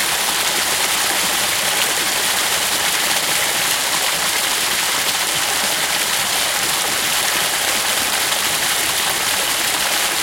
Tony Neuman park streaming close
Recording of a small pound and streams present in Tony Neuman`s Park, Luxembourg.
park, water, nature, stream, field-recording